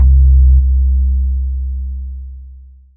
Ambient Bass1.1
Sweet mellow bass for meditative music
ambient, background-sound